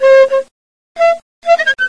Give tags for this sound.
panpipes
wind

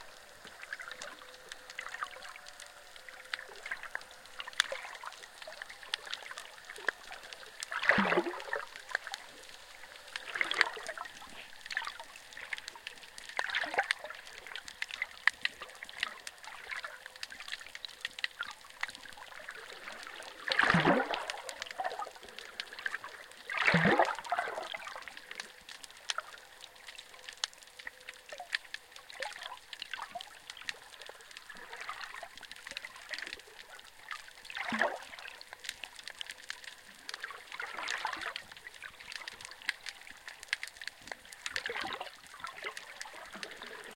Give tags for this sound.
boat
bubble
field-recording
harbor
hydrophone
marine
motor
plink
submerged
transients
underwater
water

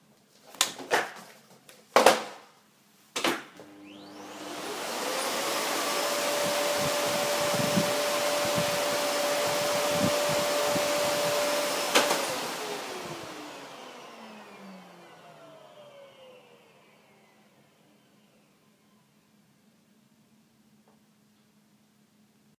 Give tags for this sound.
start
iphone
home
vacuum-cleaner

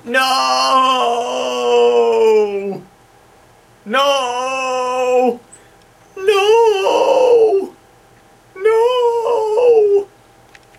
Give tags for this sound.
comedy
yell
exaggerated
overly-dramatic
no
funny
shock

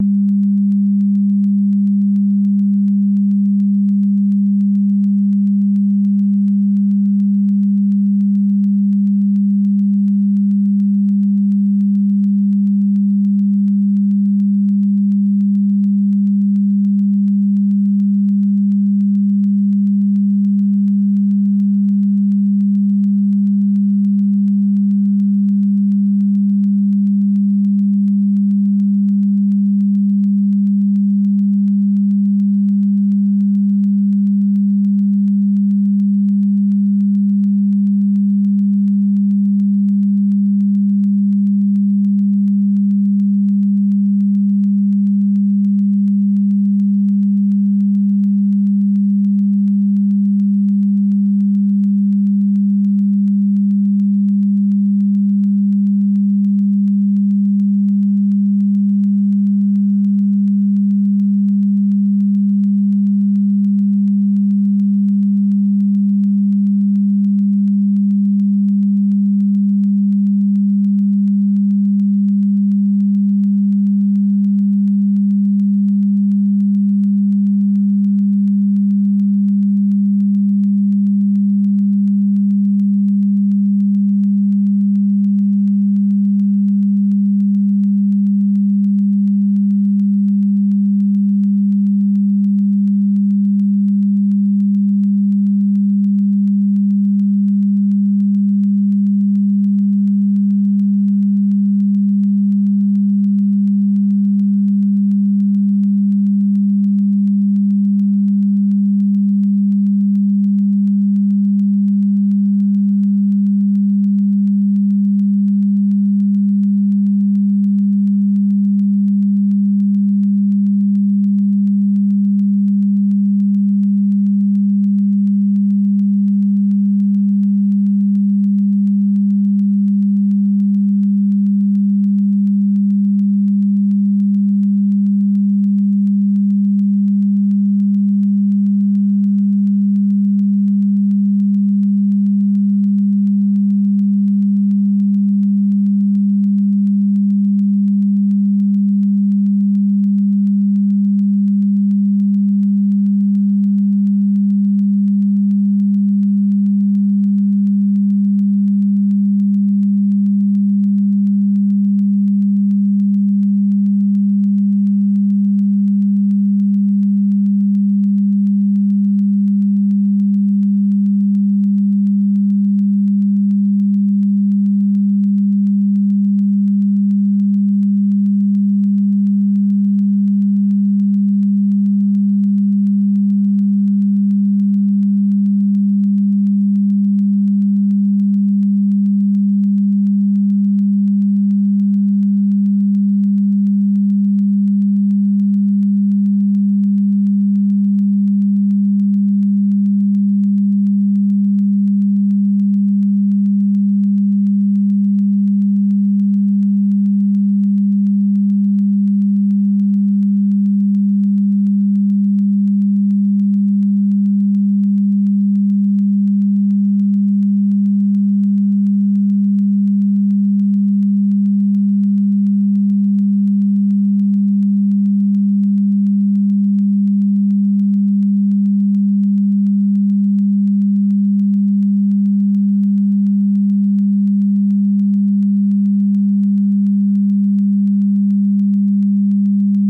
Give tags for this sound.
electric
synthetic
sound

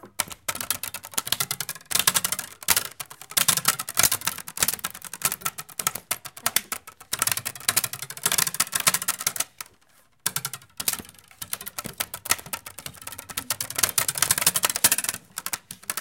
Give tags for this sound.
school
recordings
France
Paris

